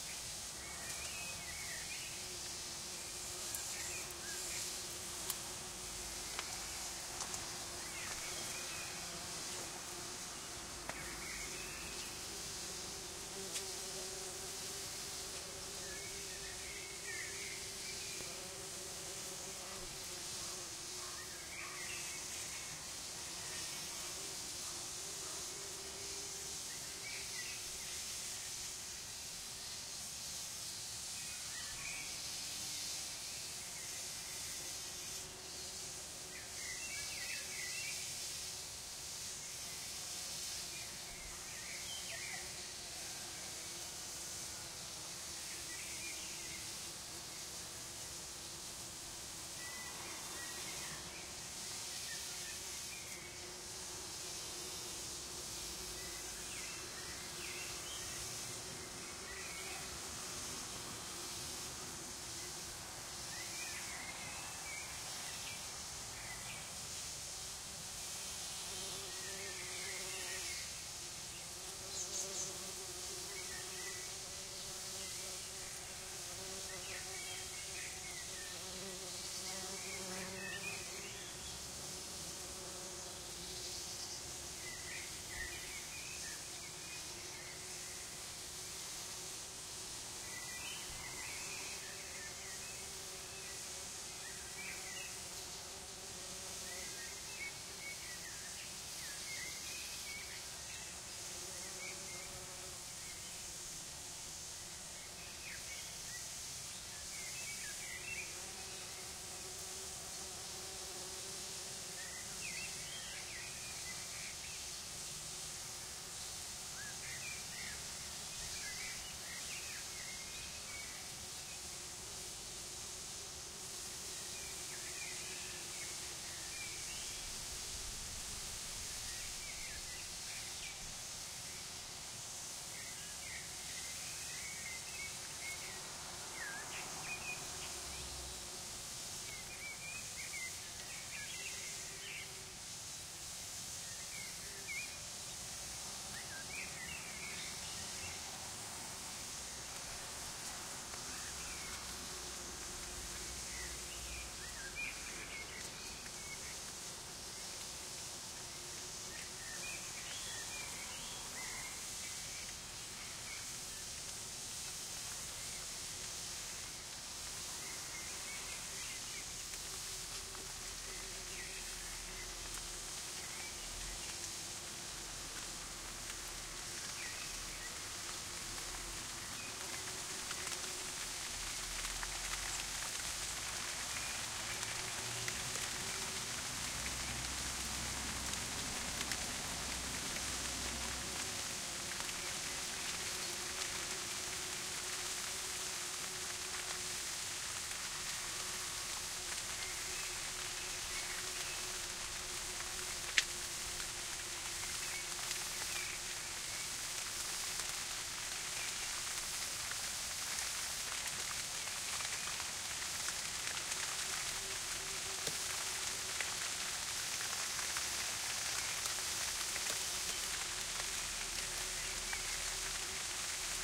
Bees on a huge kiwi plant
A recording of a lot of beens harvesting a huge kiwi plant